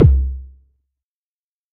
Simple synthetic kick drum bass sound, made with Propellerheads Reason.
bass, kick, muffled, Smooth